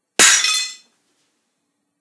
Breaking Glass #2
Variation of the first breaking glass sound. Might be useful for breaking porcelain plates or bowls.
agaxly, bowl, ceramic, china, glass, plate, porcelain, shatter, smash